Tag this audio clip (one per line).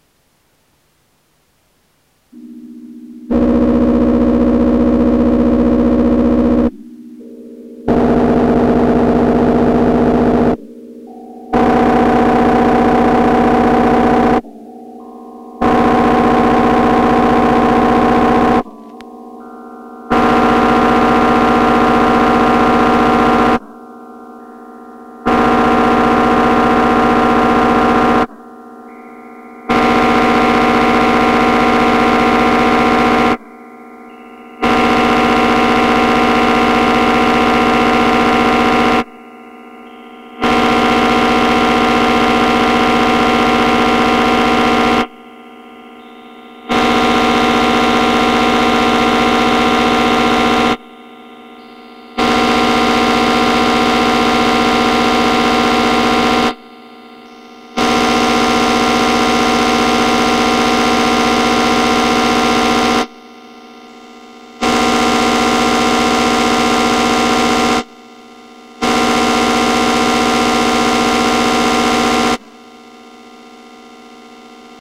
Kulturfabrik
Synthesizer